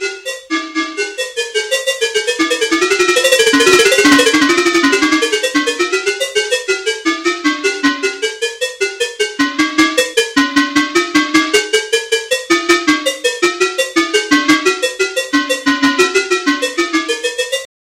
Grained-Cowbell-FXb
Design ambient grained cowbell effect.